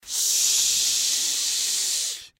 Som de "xiu" pedindo silêncio. Gravado com Tascam.
Gravado para a disciplina de Captação e Edição de Áudio do curso Rádio, TV e Internet, Universidade Anhembi Morumbi. São Paulo-SP. Brasil.